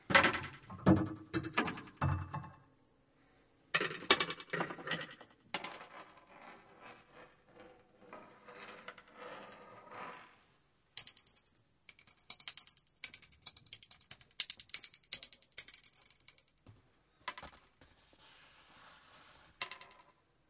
various mysterious noises made with wire iron pieces. Sennheiser MKH60 + MKH30 into Shure FP24, PCM M10 recorder